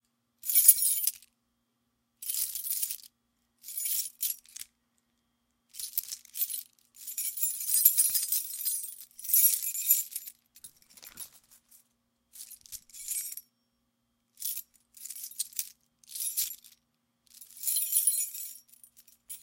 Multiple key jingles
car, keys